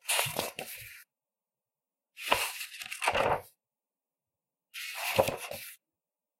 Turning pages in a book
Sound of turning pages of Harry Potter and the Chamber of Secrets.
pages, sfx, sound-design, effect, read, flip, sound, turn, newspaper, paper, ASMR, reading, turning